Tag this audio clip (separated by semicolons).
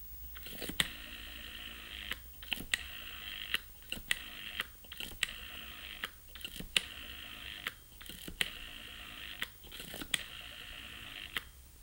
dialing; dialing-disc; old-phone; phone; telephone